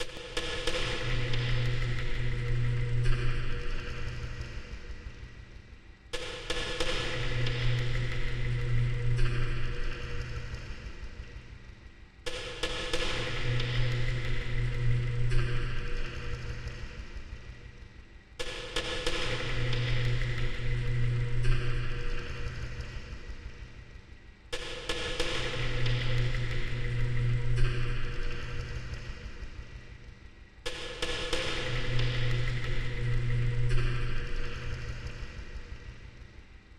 Started out with some button sounds from a TEAC A6300 then digitally manipulated them. If you used it I'd love to see how you used it.